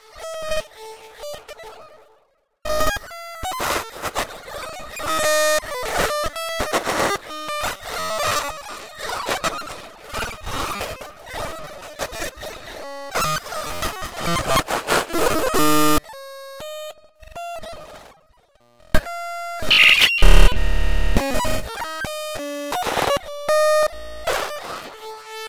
02 My cousin playing with our Mon0tendo walkie talkie
My cousin playing with a Nintendo-unit that sounds like a walkie-talkie.
silly, noise, glitch, useless, mangled, noise-dub, nifty